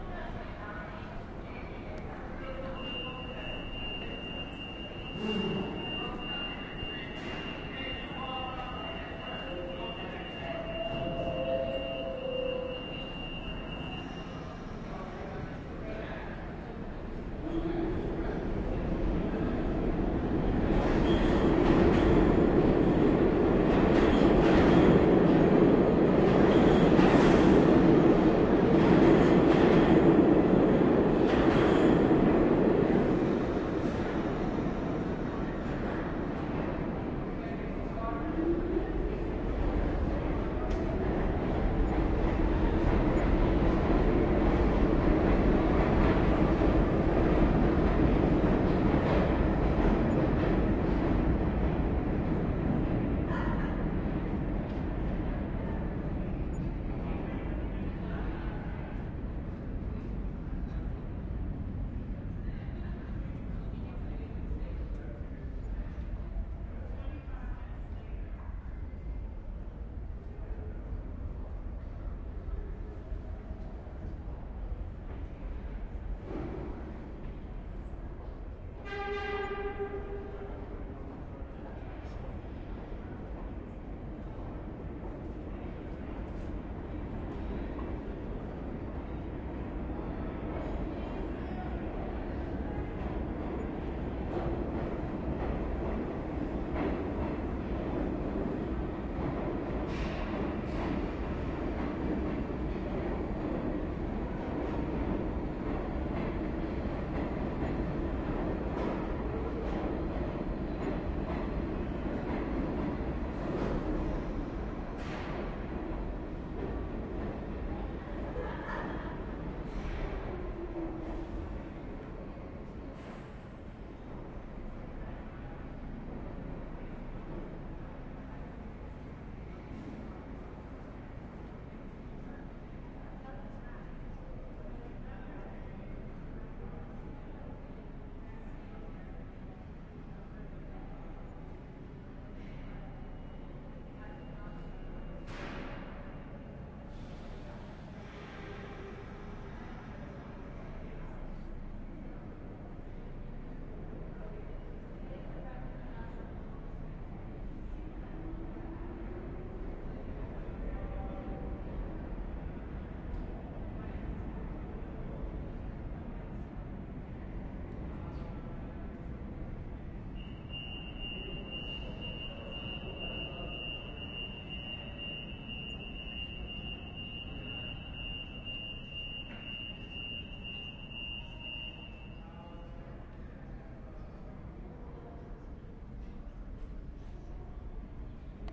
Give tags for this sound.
field-recording
H4n
MTA
NYC
subway
Zoom

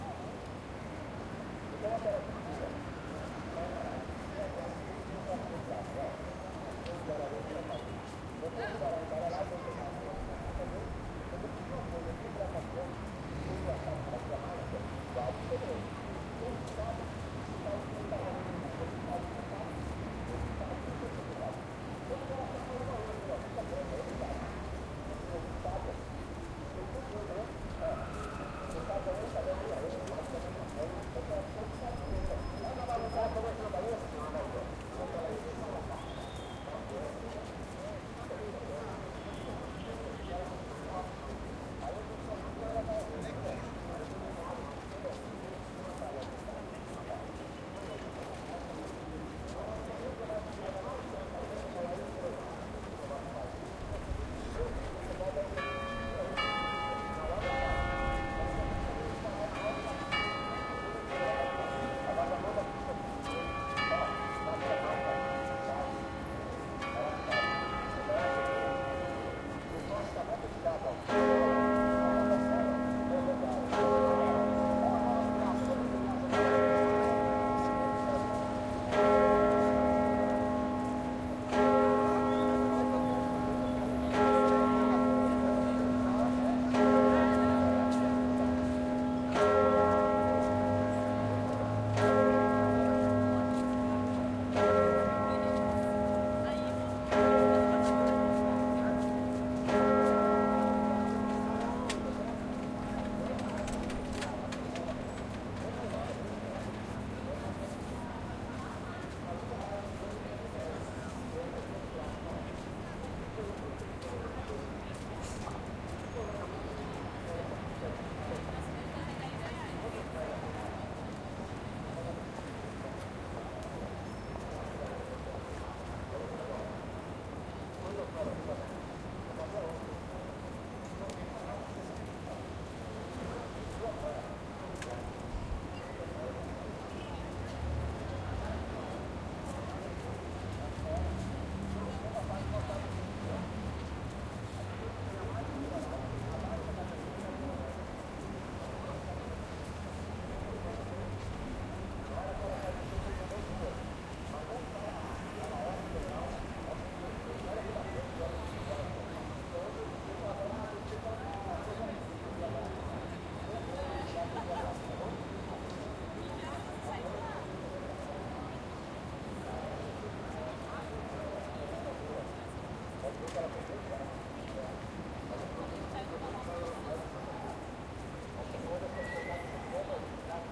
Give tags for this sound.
ambiente
bell
cathedral
church
church-bell
igreja
mosteiro
mosteirodesaobento
rua
saobento
sino

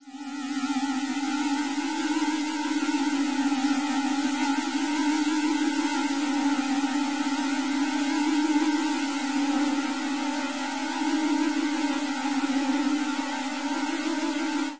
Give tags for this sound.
new
NoiseBient
Experimental
Psychedelic
breakbeat
Darkwave
Listening
Ambient
Easy
Noisecore
Noise
Dark

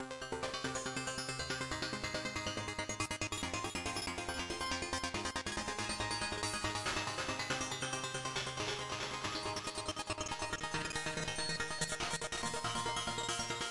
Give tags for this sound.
sequenced
synth

